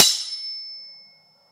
Sword Clash (9)
This sound was recorded with an iPod touch (5th gen)
The sound you hear is actually just a couple of large kitchen spatulas clashing together
clash, clashing, metallic, clang, stainless, slash, clanging, knife, ting, ding, struck, swords, clank, ping, sword, Ipod, strike, slashing, hit, steel, ringing, metal, ring, impact, metal-on-metal